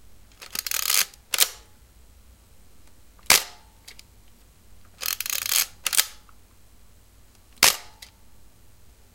old camera noise silver ricoh tls 401
old, silver, noise, camera